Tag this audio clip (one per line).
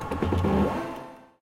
MACHINE
MOTOR
Operation
mechanical